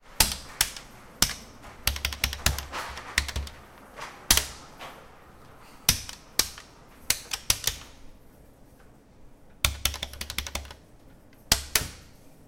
percussive sound that is produced by the pulsation of the buttons to selection of the photocopier at the library of the UPF. This sound was recorded in silence environment and close to the source.